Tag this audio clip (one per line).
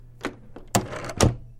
door
dorm
close
interior
closing